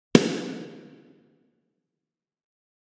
Metal Snare
Just tweaked this snare for my recording. thought i could share with you :3
if you love my sounds follow my studio page:
Snare, Metal, Drum-Set, Drum-Kit, Drums, Drum